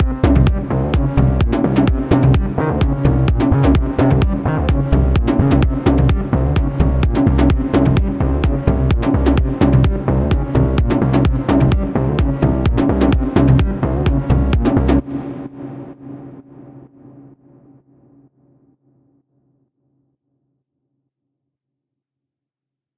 This track is possible to be used as a background loop thus groovy atmosphere might be considered.
army, beat, drum, interesting, loop, rhythm, tomb, War